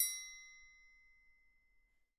Soft open triangle sound
idiophone, perc, hit